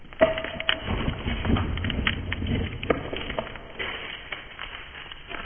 Wood Colliding - Simulated Tall Ship Crashing - V1
Created by recording the sound of breaking branches and combining the sounds and processing in Audacity.